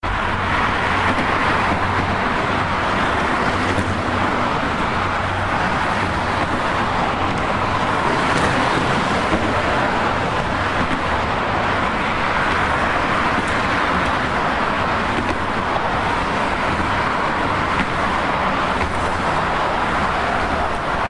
Freeway traffic 2 ways 6 lanes off floating bridge Sunday afternoon, summer 2010, Seattle Washington, USA
Highway, traffic